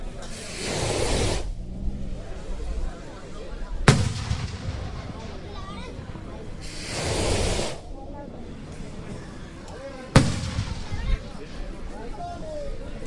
People on a catholic saint celebration in northern Spain with fireworks.
banter, celebration, fireworks, party, people, talking, town